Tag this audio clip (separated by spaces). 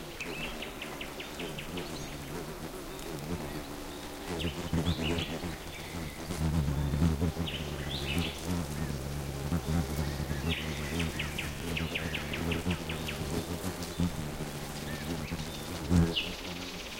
donana field-recording hawkmoth insects nature spring